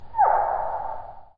Dinosaur 0 - Khủng Long 0
AUDACITY
Stereo channel:
- Cut section 17.490s to 17.724s
- Effect→Normailize...
✓Remove DC offset
✓Normailze maximum amplitude to: –3.0
✓Normalize stereo channels independently
- Select section: 0.139s to 0.235s
- Effect→Noise Reduction
Get Noise Profile
- Select all
- Effect→Noise Reduction
Noise reduction (dB): 12
Sensitivity: 6
Frequency smoothing (bands): 3
- Remove section after 0.150s
- Select section: 0.100s to 0.15s
- Effect→Fade Out
- Select all
- Effect→Change Speed…
Speed Multiplier: 0.330
- Effect→Change Speed…
Speed Multiplier: 0.330
animal, creature, dinosaur, khu, long, monster, ng